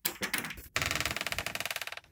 Door handle opening creak
metal door handle unlocking and door creaking as it opens
(recorded with Blue Yeti)
creek, door, handle